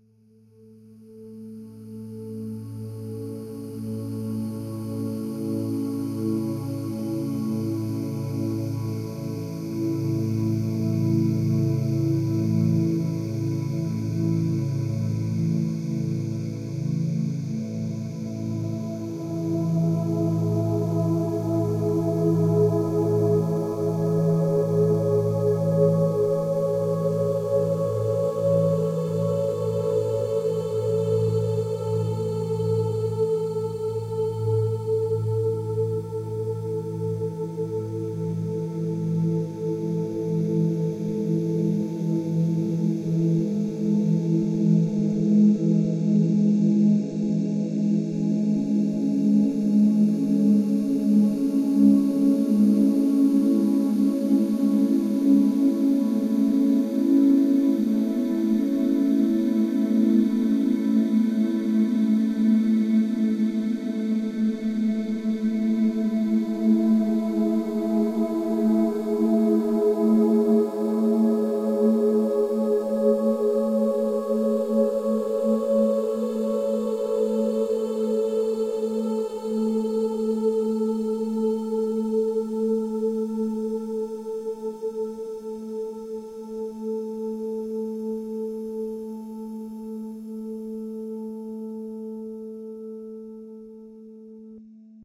An ethereal sound made by processing female singing. Recording chain - Rode NT1-A (mic) - Sound Devices MixPre (preamp)
blurred, choir, emotion, ethereal, experimental, female, synthetic-atmospheres, vocal, voice